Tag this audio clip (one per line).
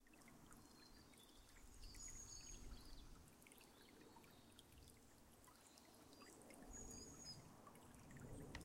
birds
birdsong
river
running-water
stream
water